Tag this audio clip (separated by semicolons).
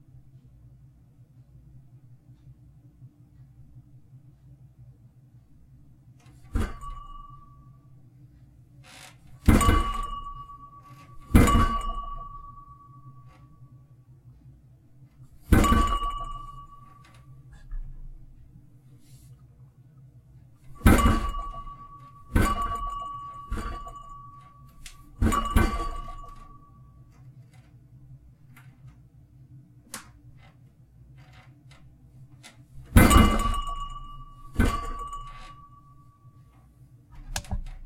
Clinking; Thumping